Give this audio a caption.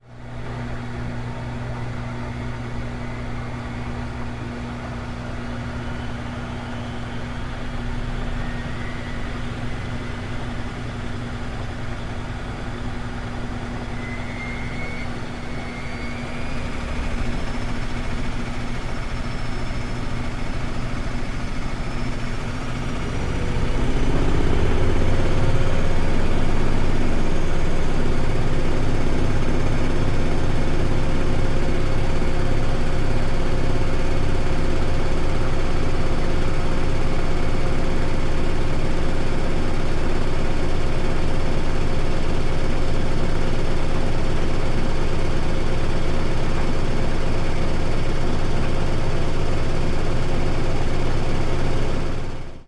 Washing machine and/or drier. Recorded with an ME66.
machine, laundry, washing